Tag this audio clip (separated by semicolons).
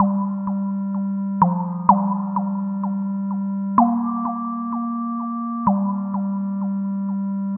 127; loop; trancegate; sample; synth; sound; trance; korg; synthie; bpm; c64; gate; siel; ms; opera; 2000